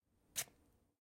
Knife Stab Melon
knife stabbing into a melon, can be edited to a variety of things
impact, knife, melon, stab